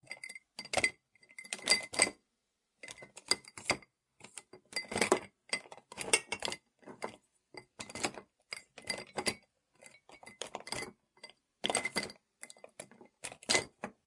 Searching For something through light objects version 2
Searching for something version 2
Thank you for the effort.
light, objects